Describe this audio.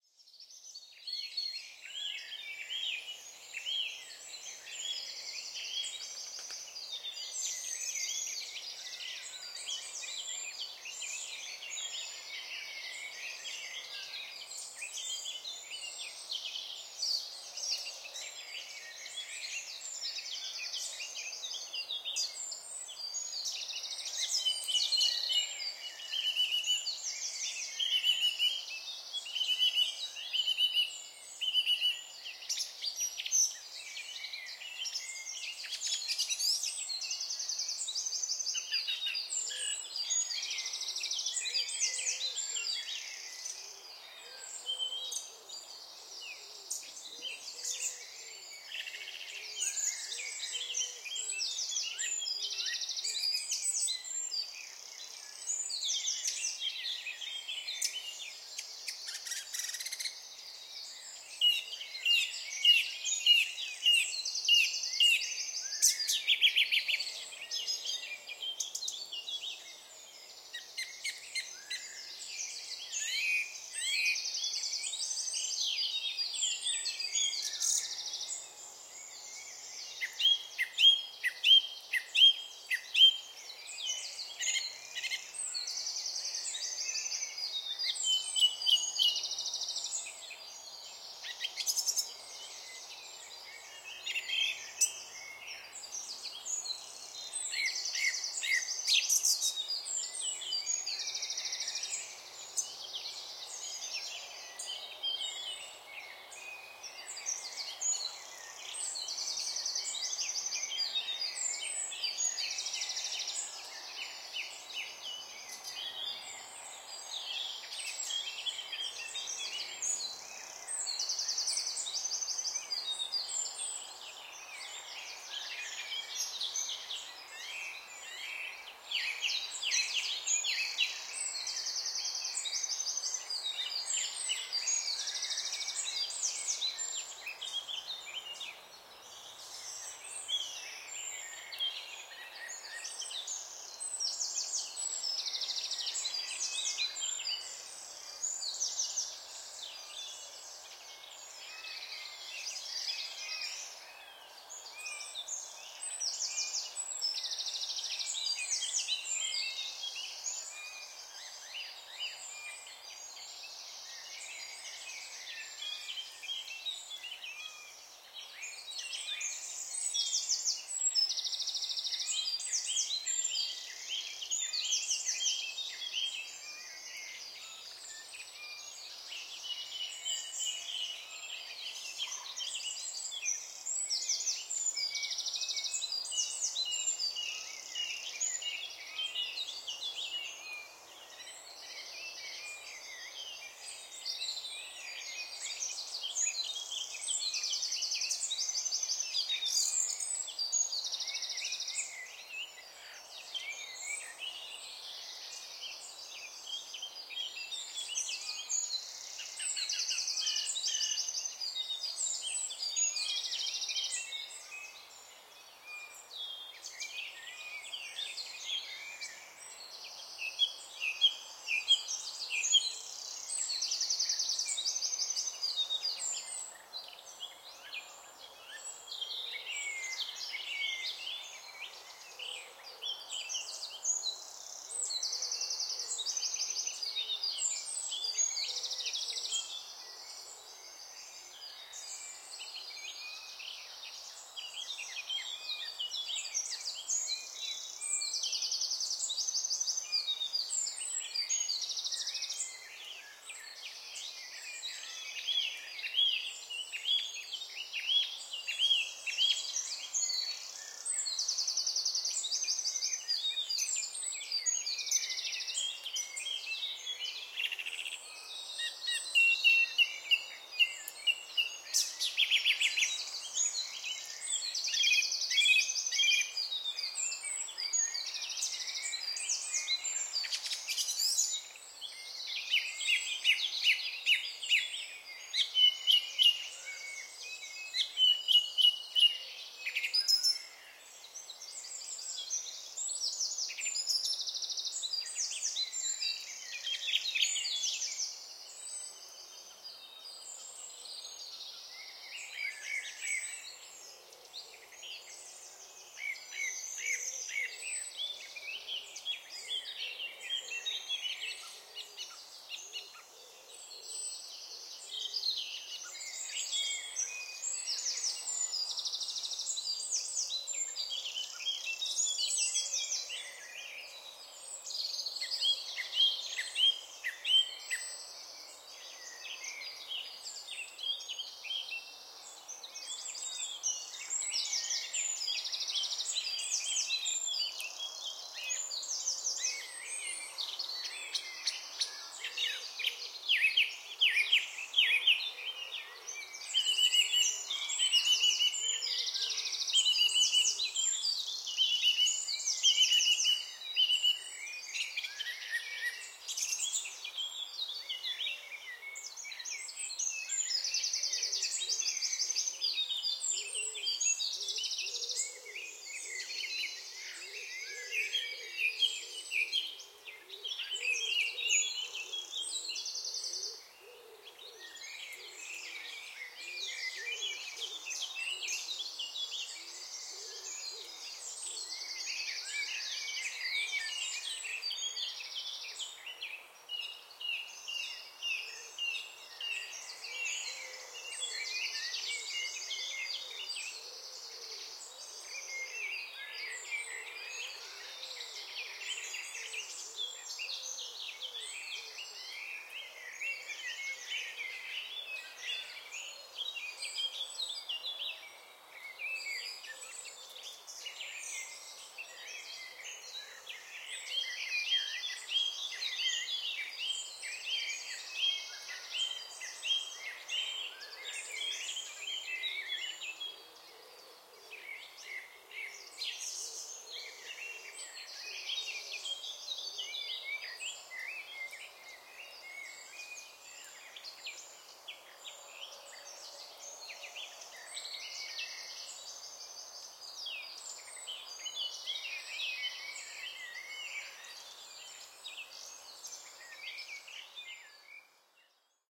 Galleywood Common Soundscape
This was recorded just before 0500 BST on 16/05/2020. This is a small area of woodland in Essex, UK. The recording features birds, including song thrush, wren, woodpigeon and blackcap.
This is a noisy environment, in spite of the lockdown we have at the moment, as it is close to roads, houses and other developments. Because of this, the high pass filter was applied in Audacity and the recording was amplified a little.
Recorded with a Zoom H5 on a tripod.
birdsong, field-recording, soundscape